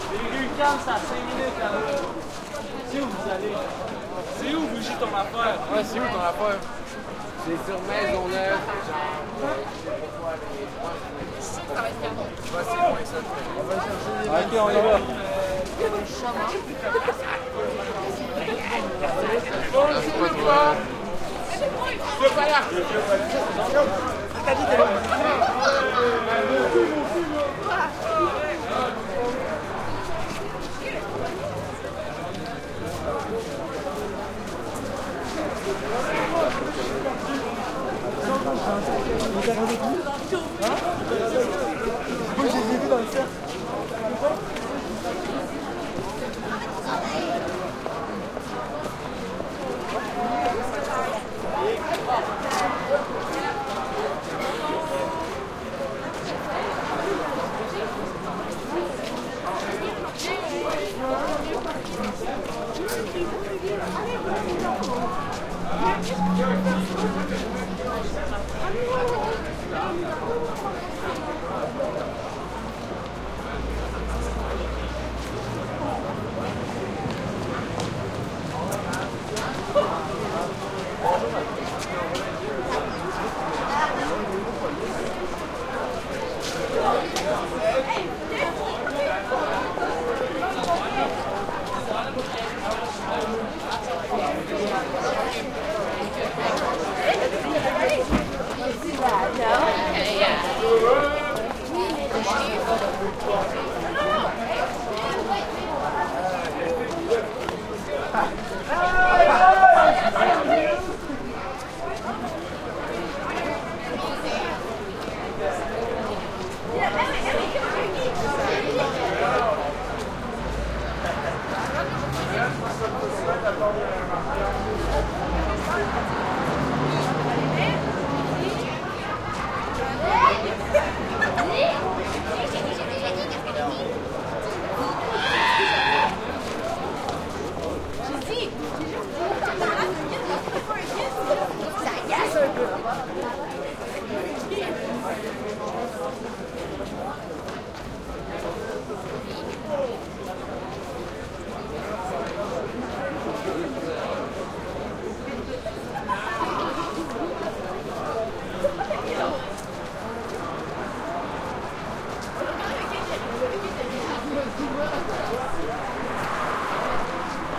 crowd ext busy outside metro entrance footsteps shoes scrapes sidewalk movement passing voices and shouts and light traffic Montreal, Canada
busy, Canada, crowd, entrance, ext, metro, Montreal